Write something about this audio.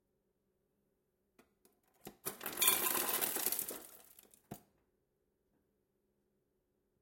Getting ice from the ice maker at the fridge